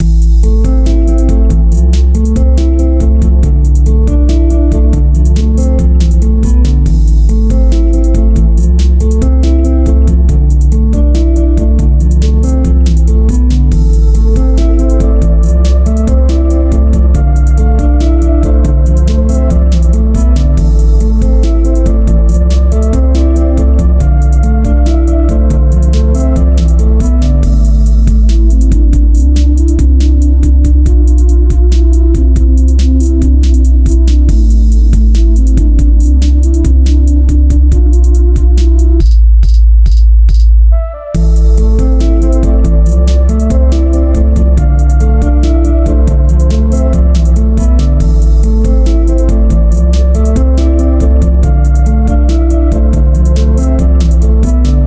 Guitar & Flute Trap Loop
I made this beat thinking about travel videos so somebody can use it while showing different shots of the landscape of an oriental country, idk. :D
game, hip, bpm, loop, beat, hiphop, videos, loops, travel, drum, chill, flute, games, hop, guitar, acoustic, music, song, 140-bpm, oriental, trap, drums, video